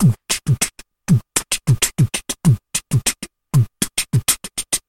A lofi beatbox percussion loop at 98 BPM.